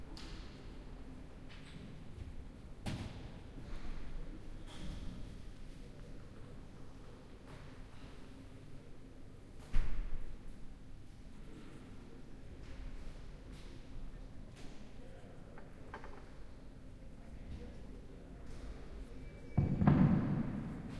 P3 ZKM cafeteria mix 9
ZKM Karlsruhe Indoor Bistro